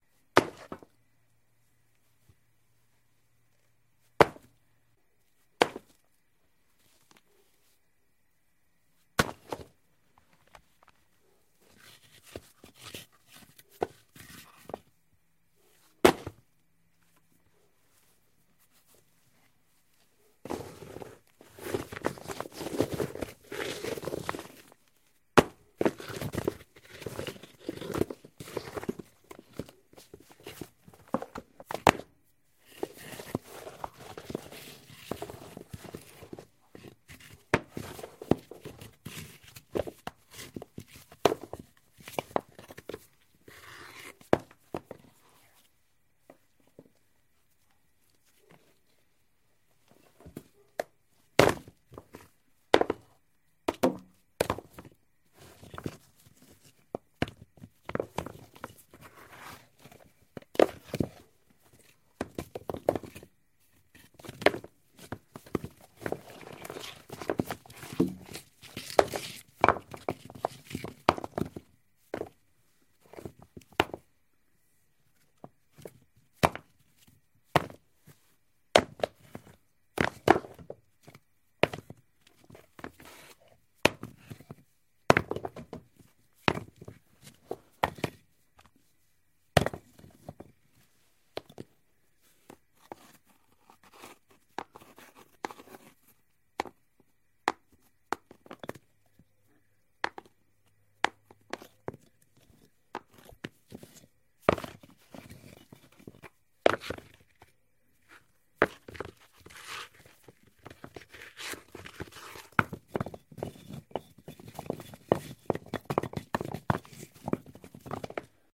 Large rocks
Lifting some breadbox sized rocks and dropping them on softball sized rocks. Also rubbing rocks of various sizes together.